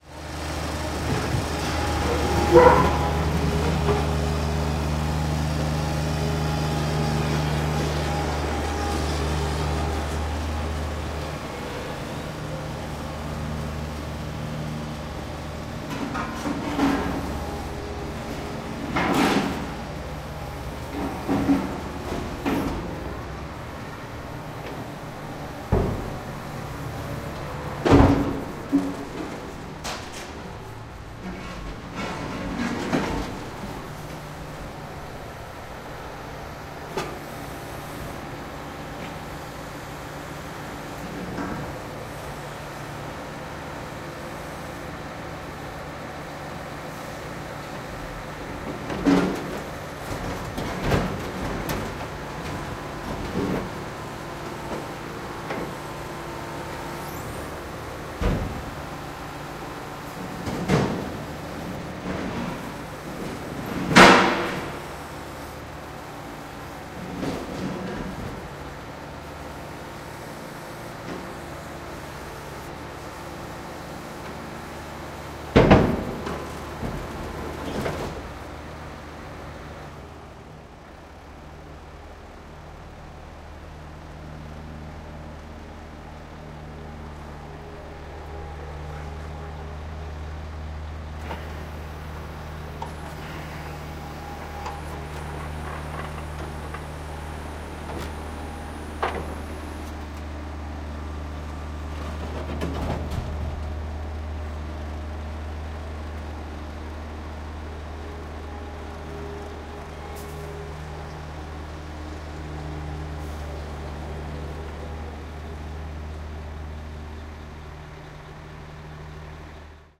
An excavator is moving rubble from a container to a truck's belly.
Recorded with Zoom H2. Edited with Audacity.
excavator, mechanical, hydraulic, machine, trash, engine, rubble